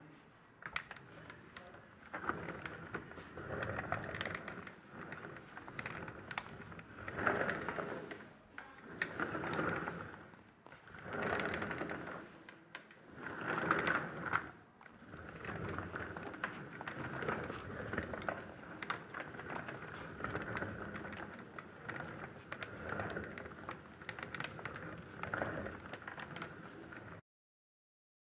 Sat on a rolling chair and rolled around a couple of times to get this sound
Recorded on the Zoom H6
OWI, wood, chair, dragging